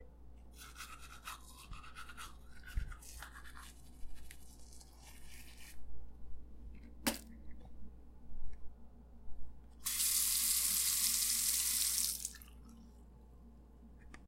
Brush Teeth and Spit
Brushing teeth then spitting out toothpaste
teeth, water, brushing, toothpaste, tooth, brush, spit